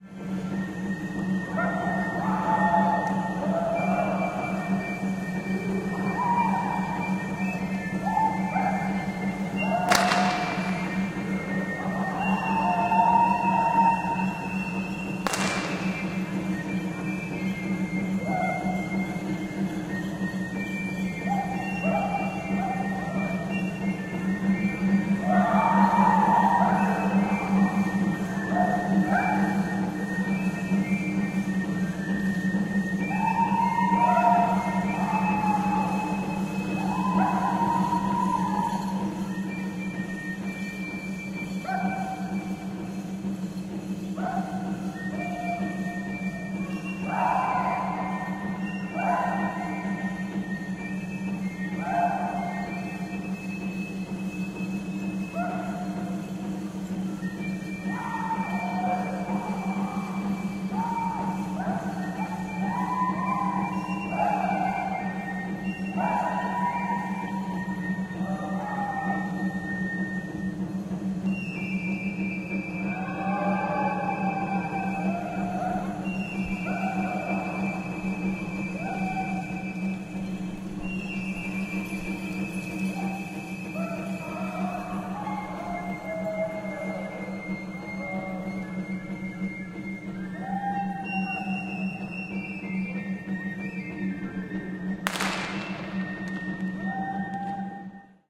Musical - Native American Day of the Dead Celebtration with Pipes, Whip Cracks, Drums
Recording of a Day of the Dead celebration at the Museum of Native American History in Washington, D.C. (2014) Features drumming, flute playing, chanting, yelling / whooping, whip crack sounds. Drenched in natural reverb (recorded from top floor of a circular performance space with a stone ceiling), with lots of low-frequency rumble. Possible use: could be processed for horror ambience.